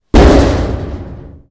S021 Metal Impact Mono
Rally car colliding with a metal surface
Impact, Collision, Metal